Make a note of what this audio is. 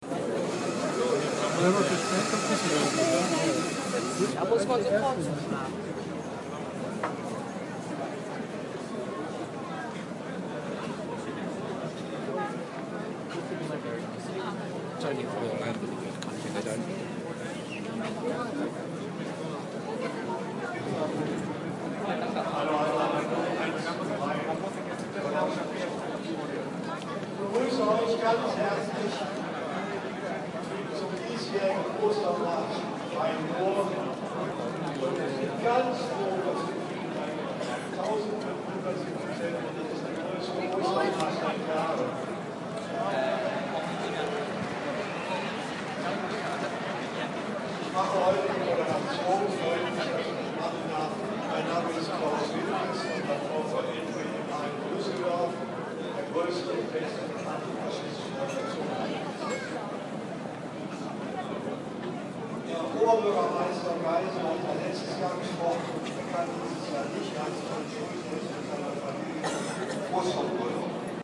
Peaceful Protest in Old town of Düsseldorf
Environment sound in Old town of Düsseldorf during a peaceful protest